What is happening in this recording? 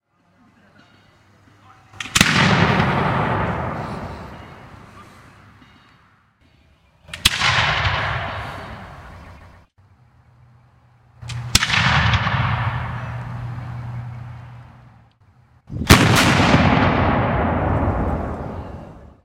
Several captures of a 1700's cannon being fired at Alamance Battleground in North Carolina.